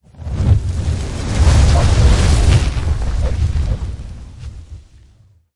Fire - Effects - Swooshes - Bursts, evolving
burning, burst, crackle, crackling, evolving, field-recording, fire, fireplace, flame, flames, glitch, intense, sci-fi, sizzles, sizzling, spark, sparks, spraying, swoosh, texture, whoosh